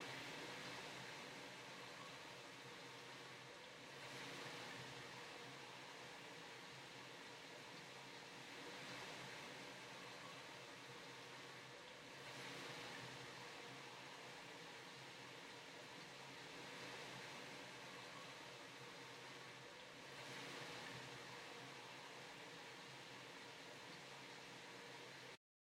A sound of a waterfall.